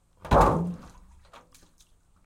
Plastic water jug hit, hollow sound, liquid feel